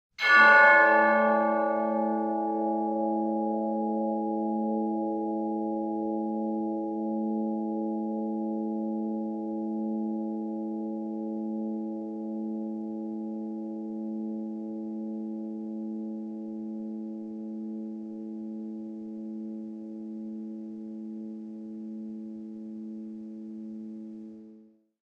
Wind Chime, Tolling, A
I loaded one of my wind chime samples into Kontakt, played a simple low major chord and added a ton of reverberation to create this bell-like tolling which works surprisingly effectively.
An example of how you might credit is by putting this in the description/credits:
Originally edited using "Kontakt" and "Cubase" Software on 16th November 2017.
toll, bell, chime, chimes, major, wind, tolling